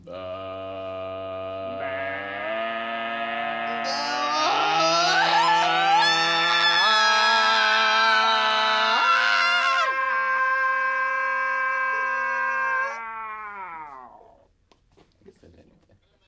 screaming voices weirdII
Three voices (2 males and 1 female) screaming weird.